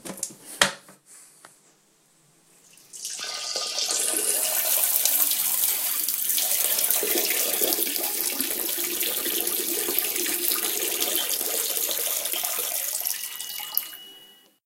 Putting the lid up and peeing. Recorded using an iPhone with Voice Memos.
disgusting, toilet, peeing, pee, gross